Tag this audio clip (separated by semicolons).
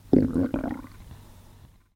disgusting; human; stomach-noises